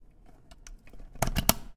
Finding the Plug
A quick recording of a 1/4" cable plugging into a receiving input.
Cable, 14, audio, click, equipment, metallic, MTC500-M002-s13